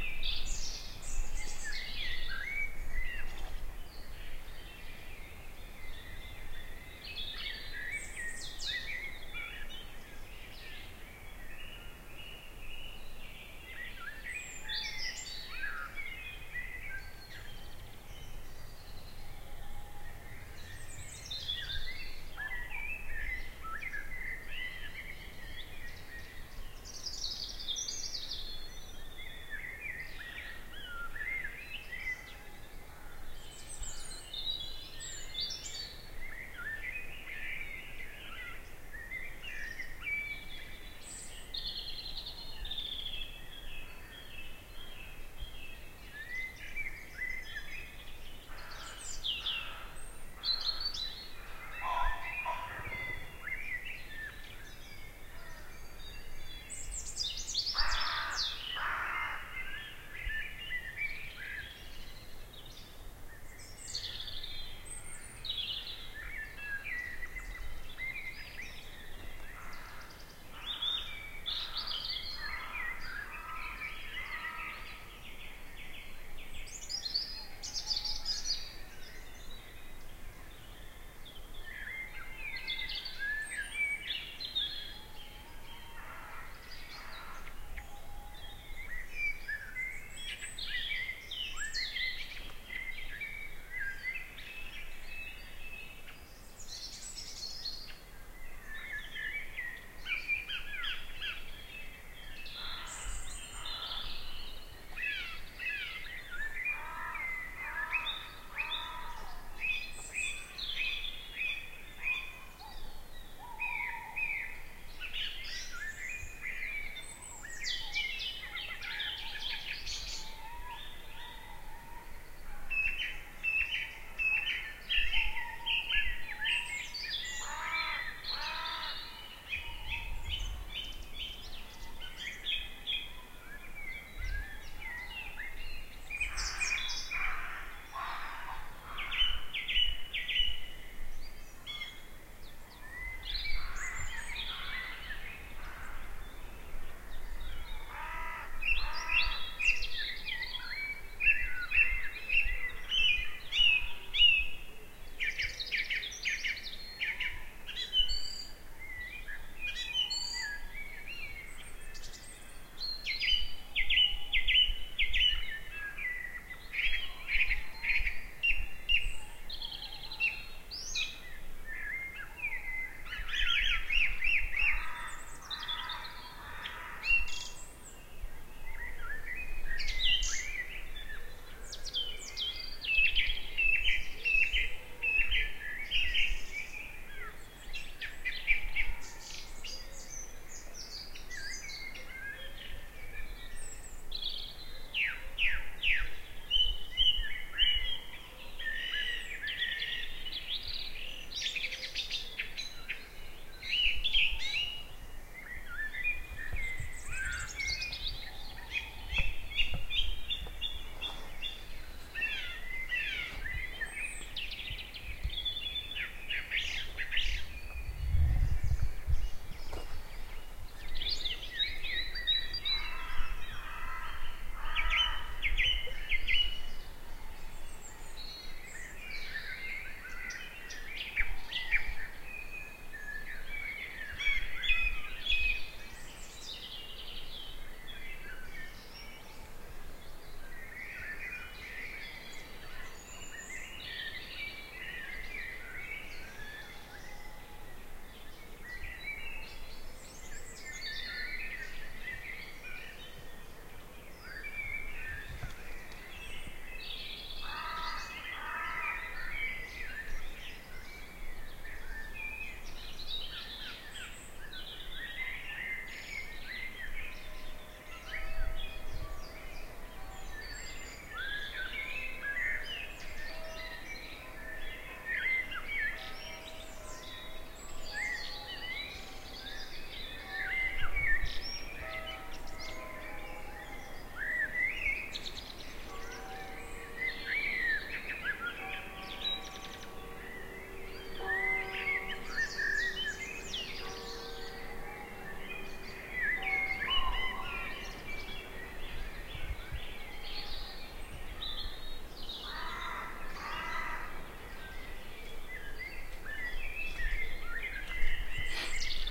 May dawn chorus recording taken in Whichford Wood, Oxfordshire, 5am. Blackbird and deer barking prominent. Recorded with Olympus LS-10, Sennheiser K6/ME66 and Sound Devices MixPre.